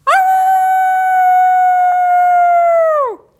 For "Young Frankenstein" I recorded three cast members howling. For play back, I'd vary speakers, delay, volume and echo effects to get a nice surround and spooky sound, that sounded a little different each time.
Dracula, Frankenstein, Halloween, Howl, Scary, Spooky, Werewolf, Wolf